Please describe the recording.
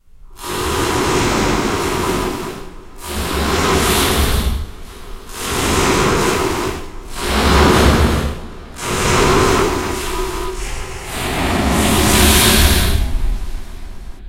This is the sound of me rubbing the rubber soles of my work boots together. As with the rest of my recent sounds, this was recorded on a Logitech USB mic and run through Audacity. The basic track was lowered in pitch about 4 steps, given about a 50% bass boost, and placed at center, followed by a second track raised in pitch 3 or 4 steps, and then one hard right raised a few more steps above that, and then each track was given a dose of the gverb effect with the room size maxed out. I don't know exactly what it sounds like, but it sounds kinda cool.
electricity, science, death, zap, sci, fi, alien, fiction, sci-fi, ray, science-fiction, heat